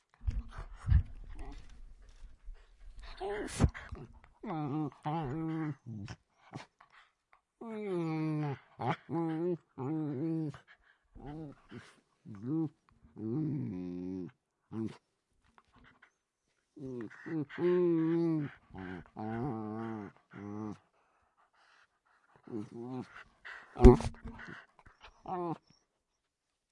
Miniature Dachshunds Playing
dogs, dog, dachshund, animals, miniature, animal, bark, mini, barking